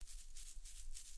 snd footsteps grass
Light, ground-based repetitive sounds, as if walking across grass in boots.